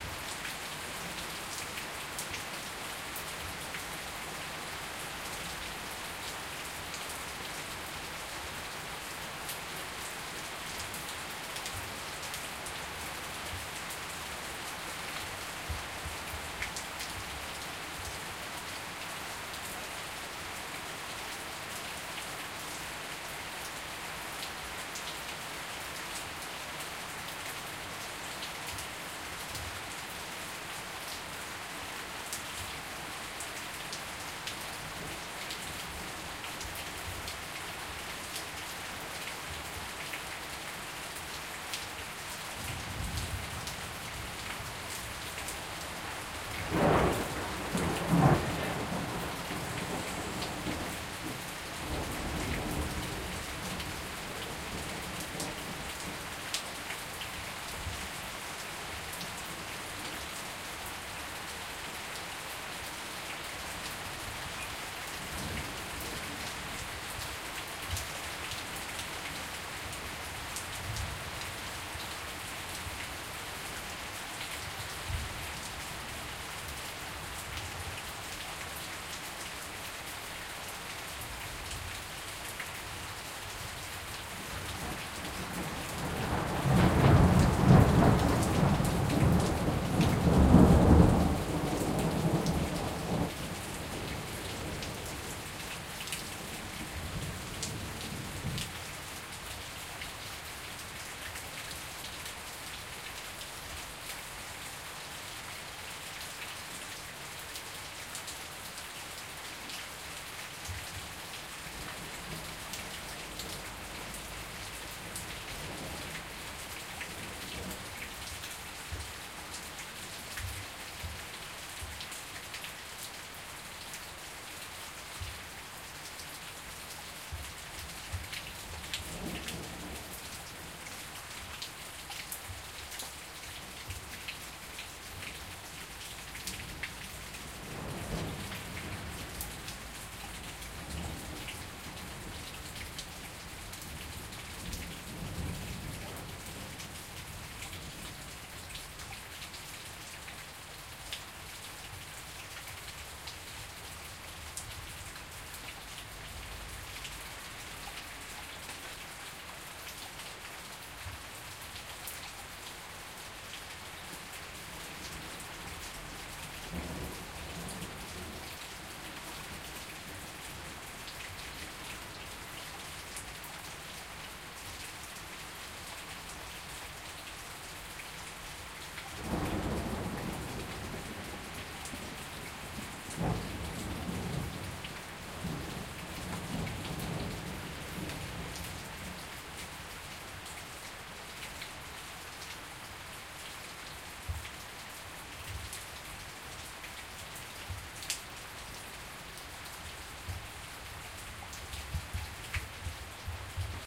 sound of rain and electric storm on Santiago de Chile.